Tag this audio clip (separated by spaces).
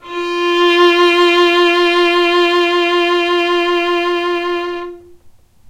violin vibrato